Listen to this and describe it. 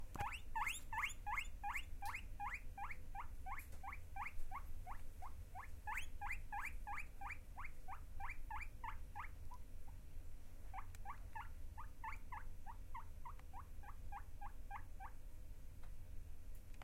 Sonic Snap Sint-Laurens
Field recordings from Sint-Laurens school in Sint-Kruis-Winkel (Belgium) and its surroundings, made by the students of 3th and 4th grade.
Belgium, Ghent, Sint-Kruis-Winkel, Sint-Laurens, Snap, Sonic